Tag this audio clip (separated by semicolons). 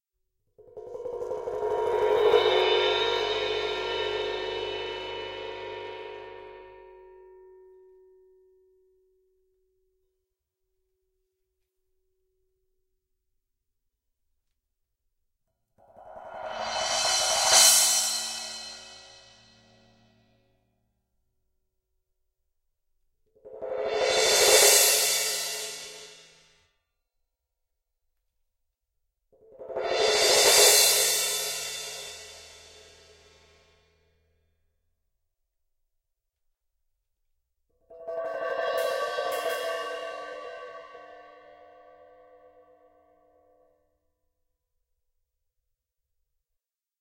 mallet; cymbal